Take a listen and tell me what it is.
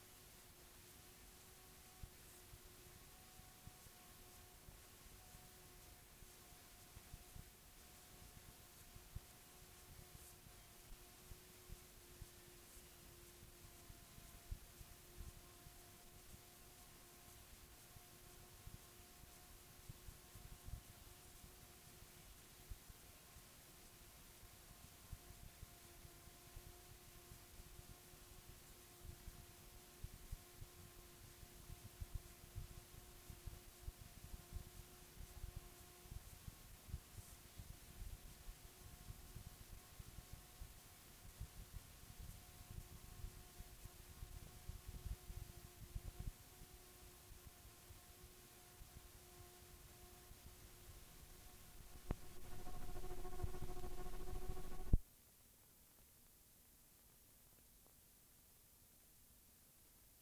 This hiss sound was ripped from digitized compact cassette.